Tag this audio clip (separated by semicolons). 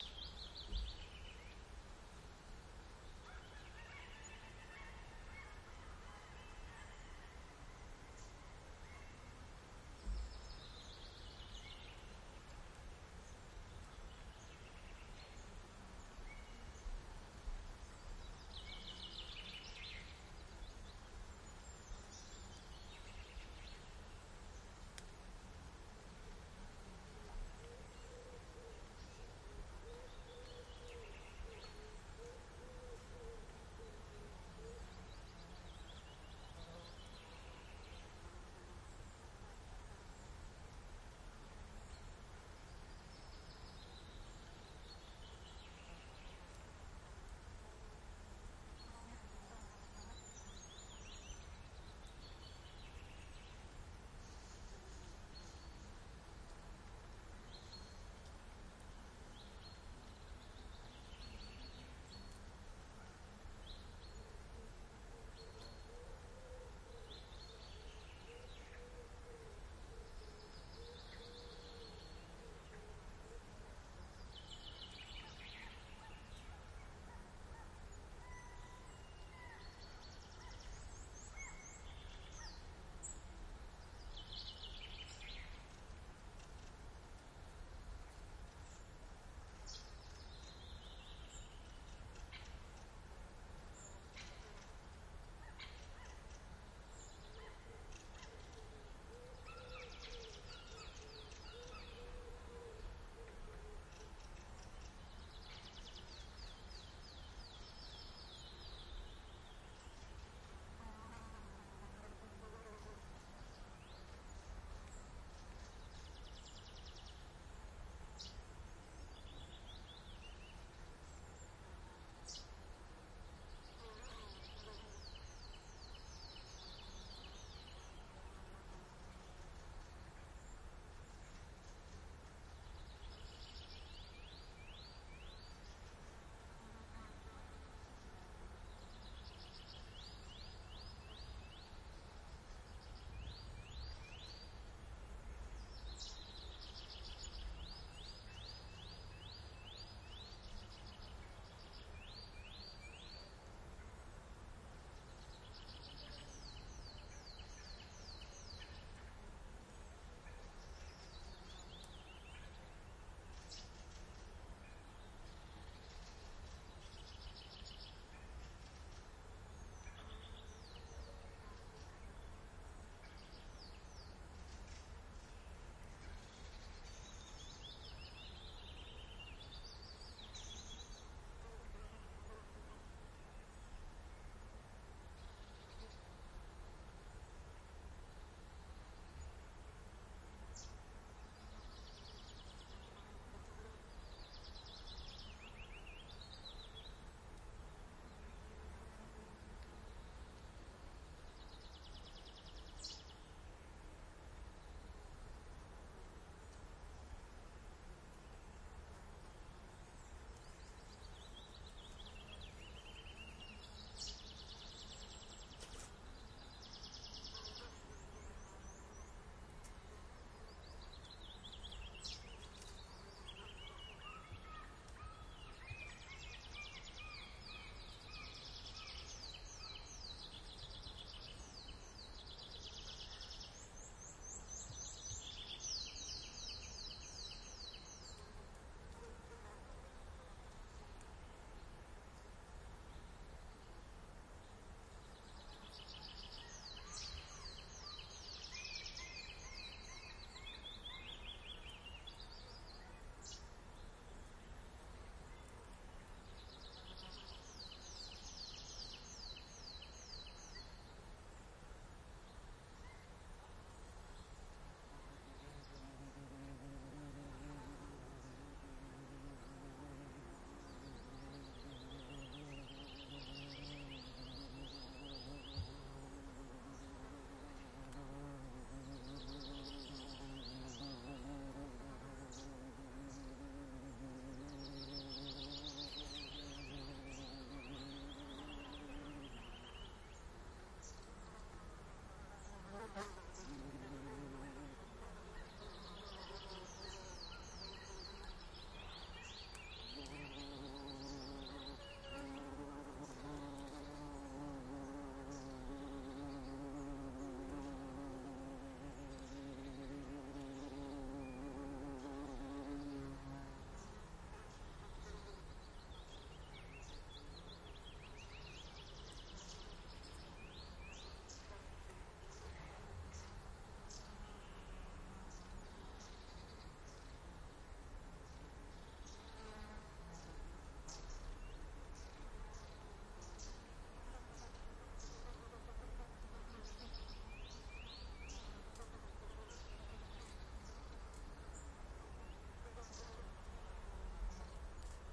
ambience; atmo; atmosphere; calm; day; field-recording; Finland; forest; insects; June; lakeside; no-Wind; quiet; summer; summer-cabin; warm